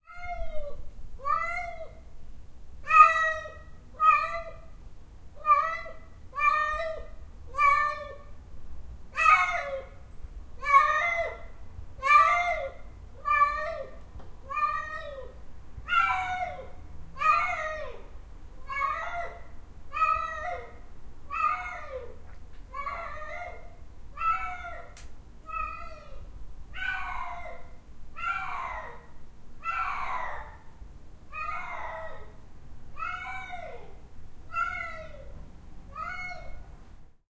young male cat dying to get outside to sow some wild oats.
Recorded with Zoom H4n.